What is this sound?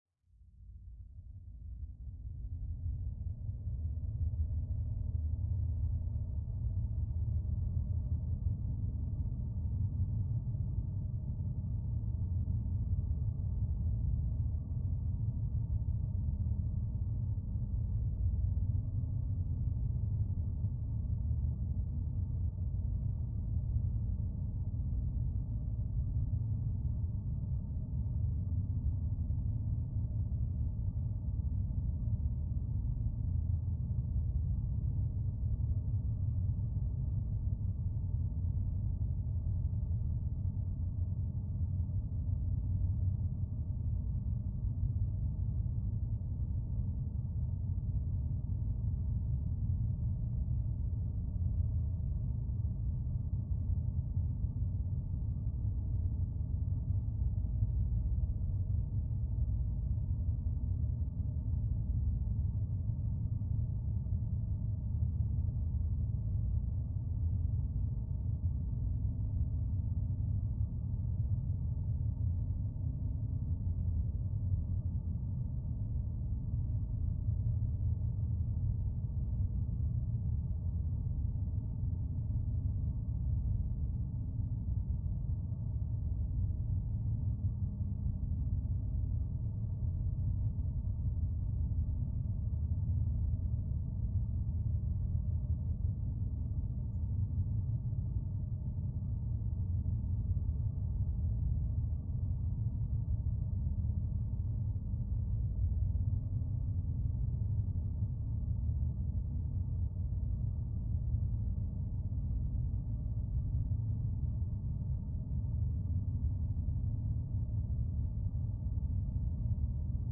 furnace room rumble created in pro tools using modulated white noise and convolution reverb